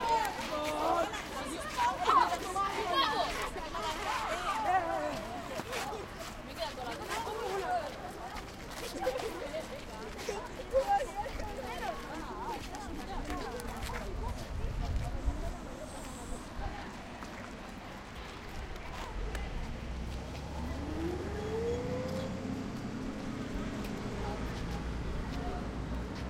It's break time. We are in front of our school. There is a lot of traffic.
Es l'hora del pati. Estem davant de l'escola. Hi ha molt tràfic.